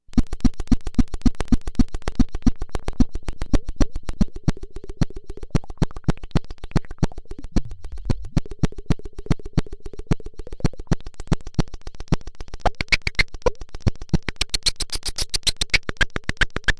nord glitch 020
Something that resembles a beat with filter gurgles in the background. Clicks and noise and fun. Created with a Nord Modular synth.
bleep, beat